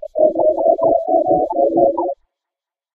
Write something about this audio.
Weird voices 130bpm E major 7
Voices mixed to a strange cluster
130, bpm